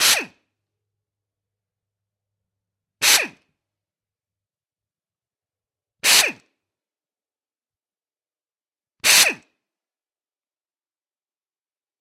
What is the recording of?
Impact wrench - Ingersoll Rand 5040t - Start 4
Ingersoll Rand 5040t impact wrench started four times in the air.
4bar, 80bpm, air-pressure, crafts, impact-wrench, ingersoll-rand, labor, metalwork, motor, pneumatic, pneumatic-tools, tools, work